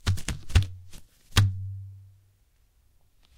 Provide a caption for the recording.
Balloon sequence 3
Balloon sequence - Zoom H2
Balloon, fighting, movement